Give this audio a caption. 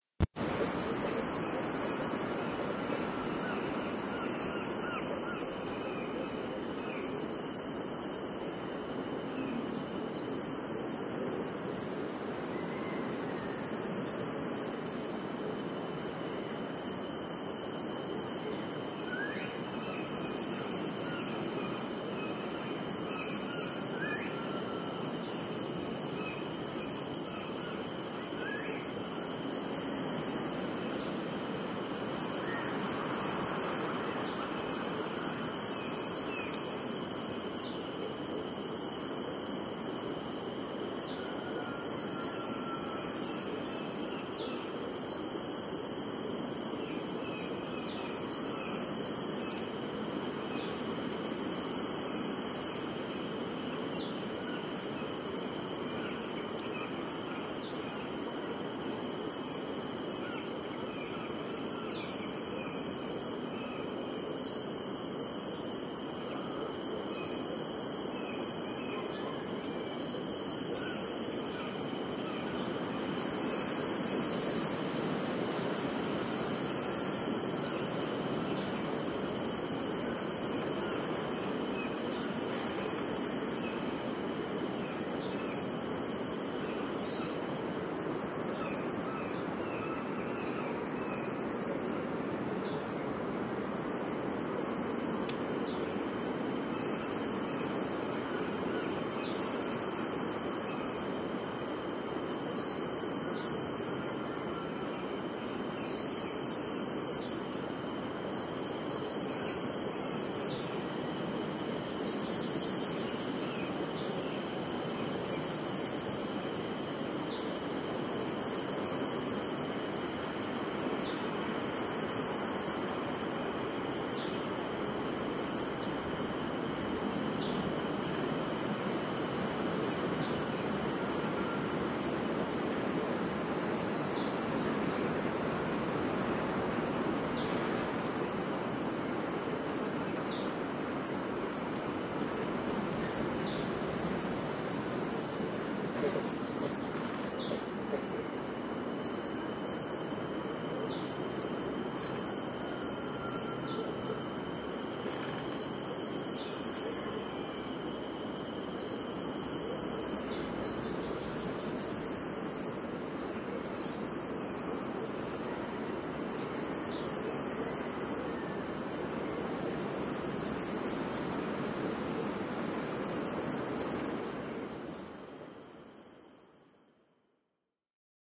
cr dry forest night 02
An ambient field recording of a lower elevation dry forest near Monteverde Costa Rica.
Recorded with a pair of AT4021 mics into a modified Marantz PMD661 and edited with Reason.
costa-rica, birds, animals, outside, forest, tropical, field-recording, wind, birdsong, ambient, nature